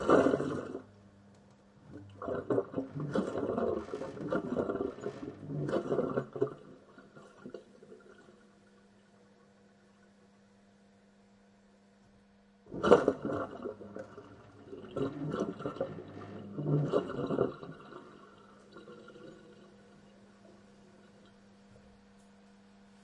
A sink which empties, with a strange metallic vibration.
recorded with a Marantz PMD661 MKII and a couple of Senheiser K6.